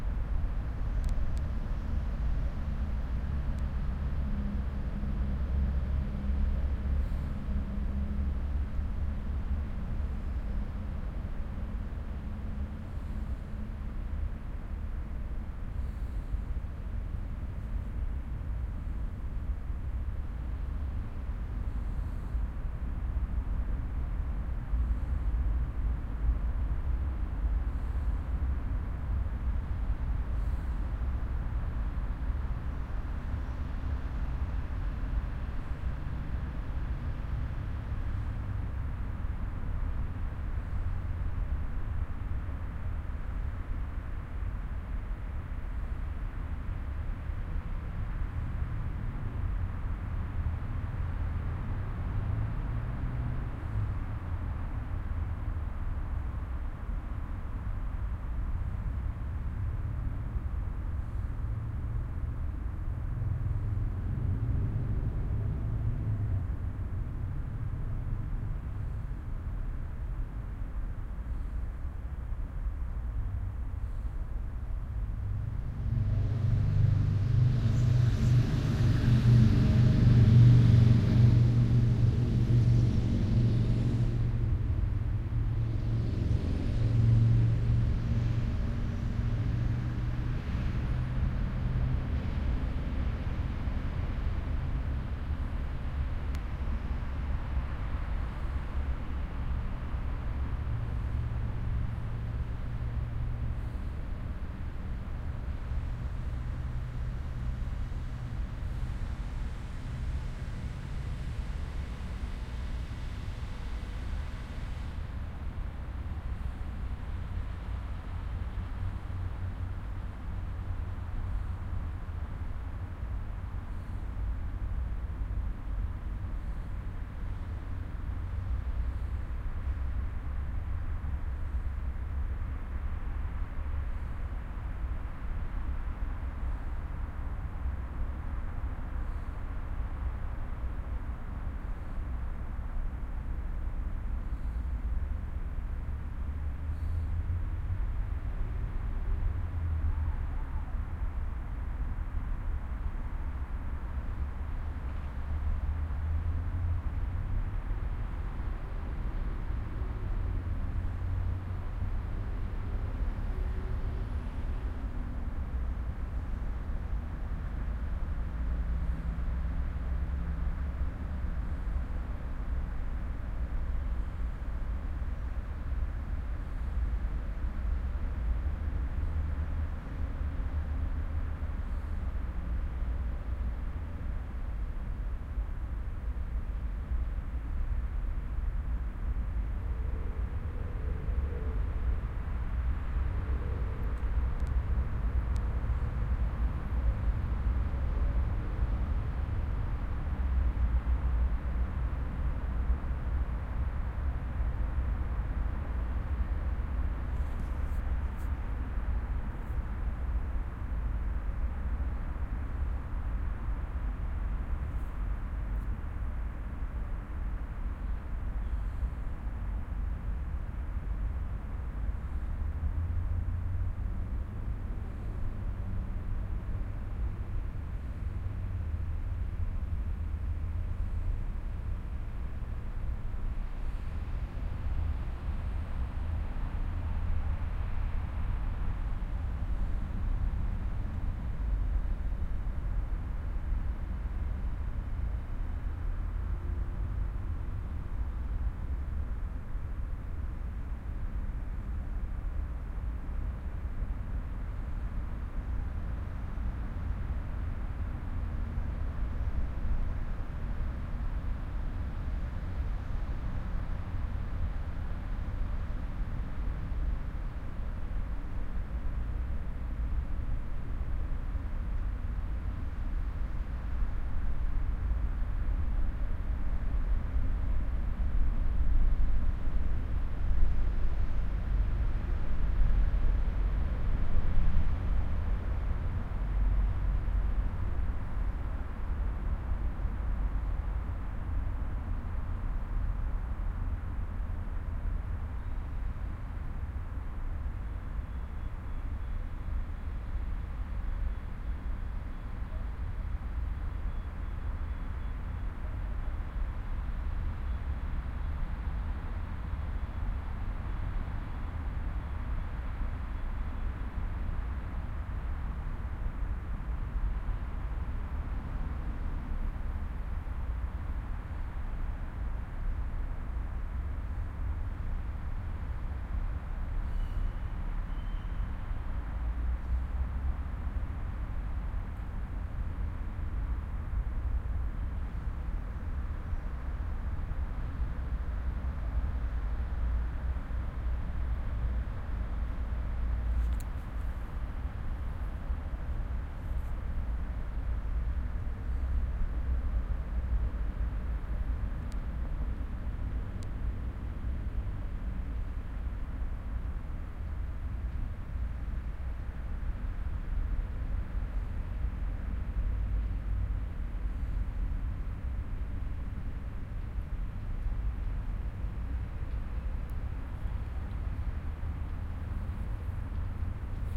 jardin turia medianoche junio 4 00h30 binaural
Soundscape recording made from the center of the Turia Garden in Valencia after midnight. The traffic flows around the garden and there is a constant change with the moving sounds of cars, motorbikes, some sirens, trucks.
Recorded with head-worn binaural Soundman OKM microphones.
park, binaural, urban, city, midnight, city-park, turia-garden, soundscape, traffic